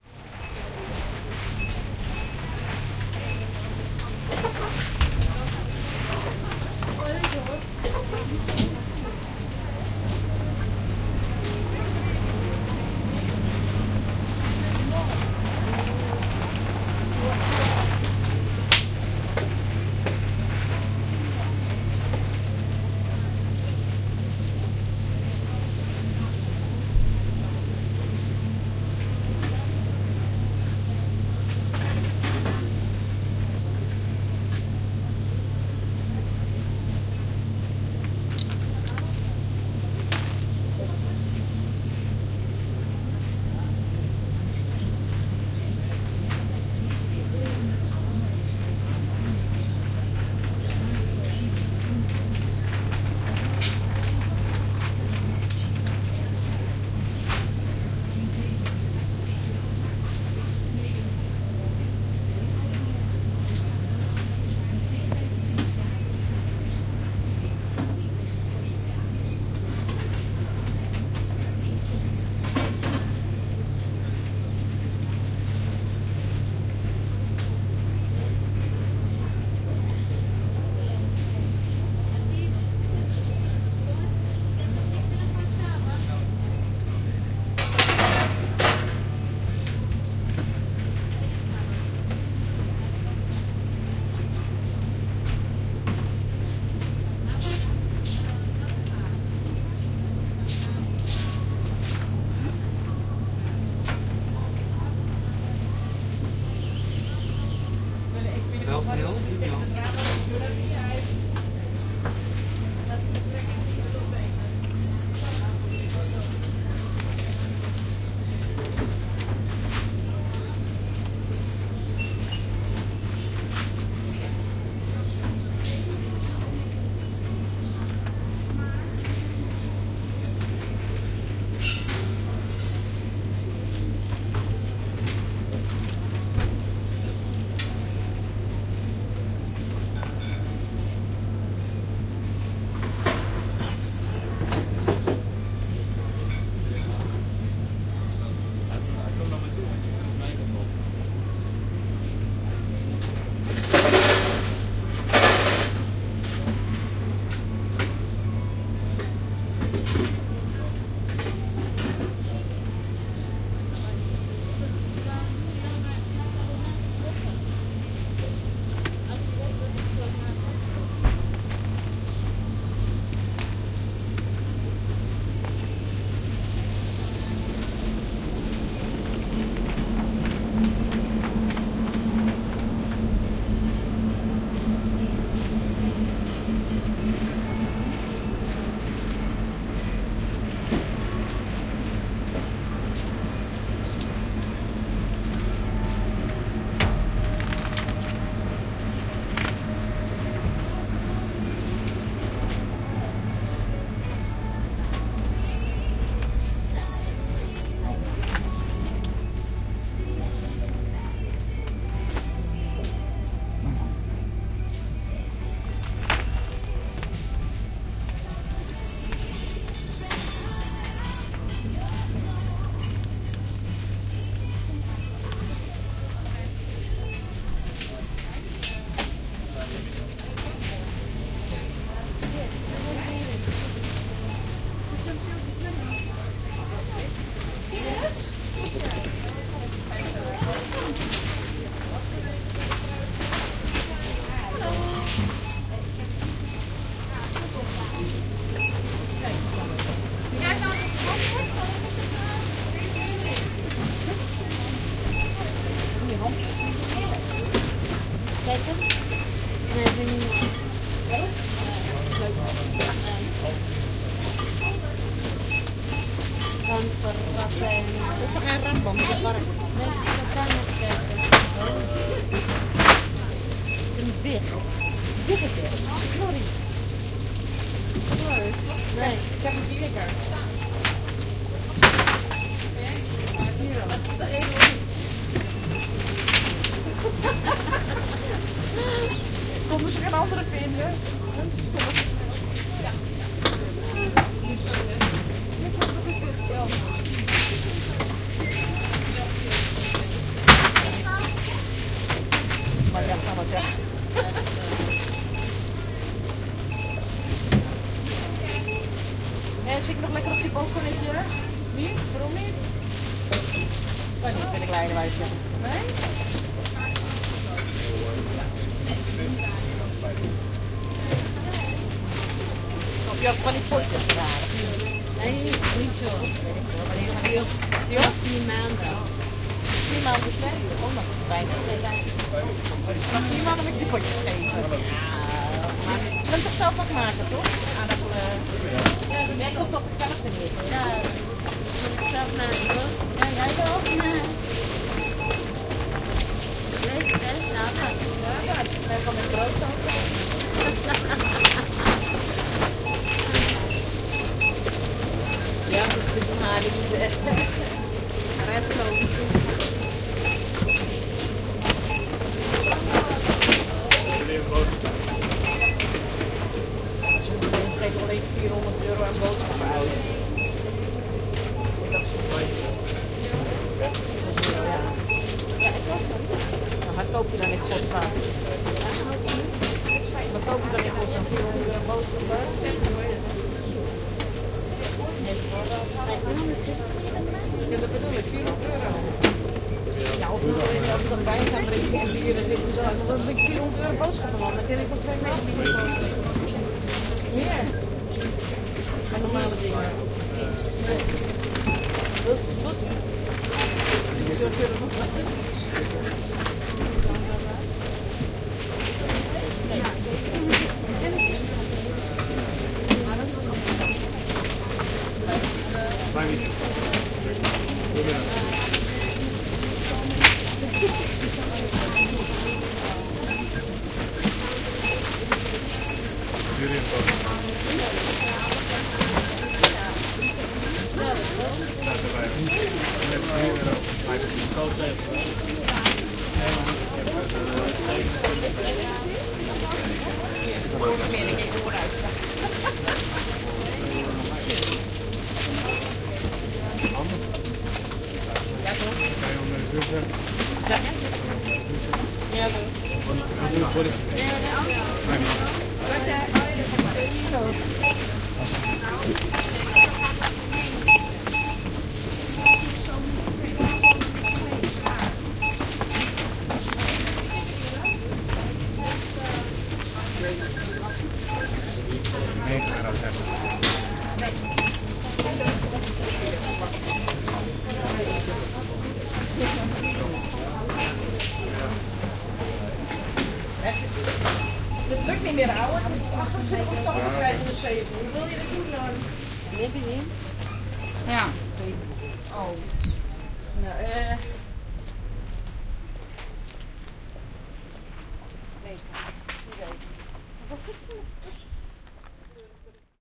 Dutch supermarket
A walk through a dutch supermaket with my Sennheiser Ambeo headset. Halfway the file I'm waiting at the cash desk and recorded some dutch chitchat.